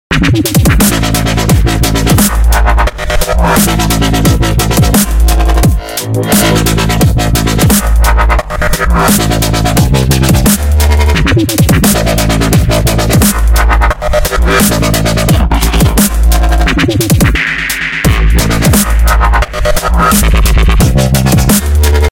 Bloodburner (loop 3)
dubstep, house, limiter, Fruity-Loops, compression, hat, reverb, perc, kick, fx, synth, bass, electronic, snare